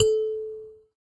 SanzAnais 69 A3 bz clo flt
a sanza (or kalimba) multisampled with tiny metallic pieces that produce buzzs